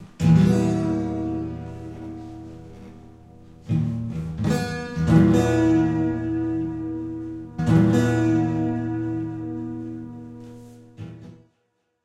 bending my guitar
bending a guitar out of tune